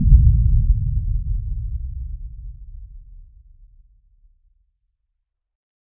Lots of lows, perhaps inaudible on small speakers. Not quite so "ringy" or gong-like as the original Cinematic Boom, and perhaps better because of that fact. This one has some frequency shaping, especially at the very beginning (first 80 ms) to give a subtle feeling of impact, and has been reverberated for a very smooth decay. This is the 44.1/16 version. Created within Cool Edit Pro.